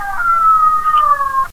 Small puppy howling, recorded with a cellphone memo feature. Transfered with a clip on mic onto a PC and edited and normalized.